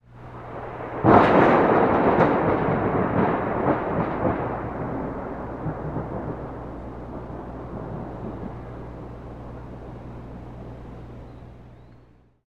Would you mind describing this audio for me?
Thunder I recorded from a window.
I Recorded it with an optimus tape deck and an old microphone (The tape I recorded it on was a maxell UR), I then used audacity and the same tape deck to convert it to digital.
If you use it please tell me what you did with it, I would love to know.